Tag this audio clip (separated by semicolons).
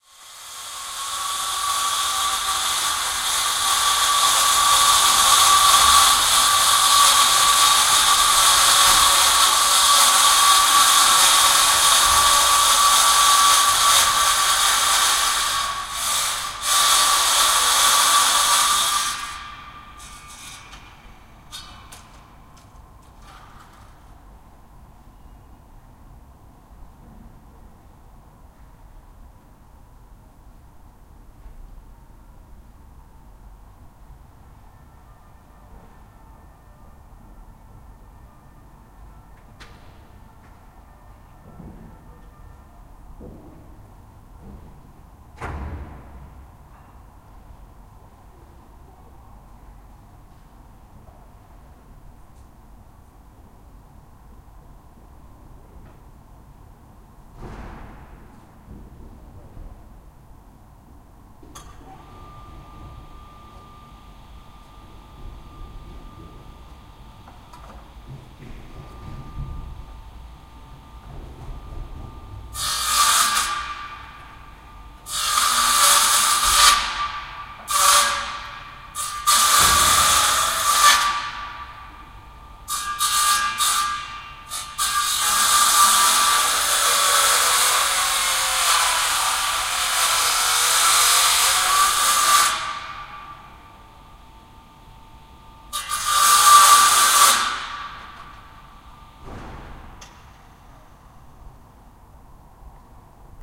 field-recording; grinder; poznan; noise; poland; courtyard; machine